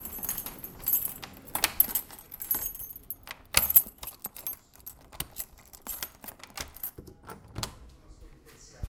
Keys Oppening
Keys unlocking the door <-
This was recorded by an H1 Zoom Handy Recorder at my home in Brazil.
;D
city, house